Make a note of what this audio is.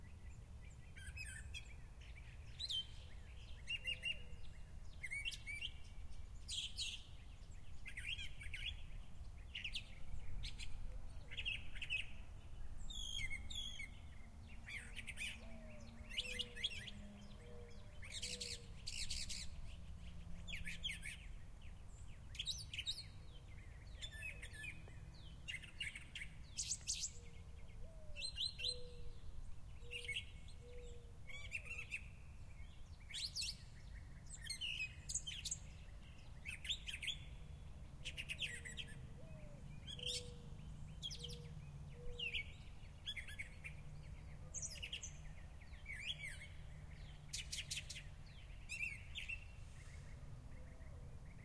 Equipment: Tascam DR-03 on-board mics
An excerpt from a longer recording of a mockingbird I made in the evening.